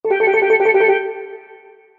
Short Steel Drum melody.